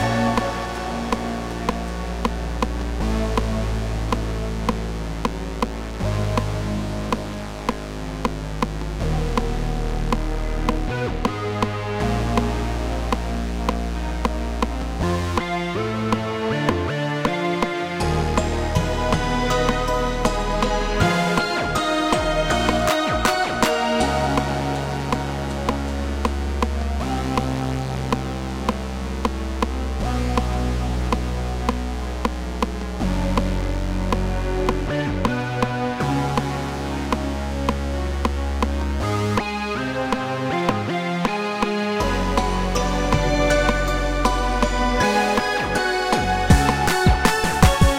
short loops 01 02 2015 4 short 1
made in ableton live 9 lite with use of a Novation Launchkey 49 keyboard
- vst plugins : Alchemy
game loop short music tune intro techno house computer gamemusic gameloop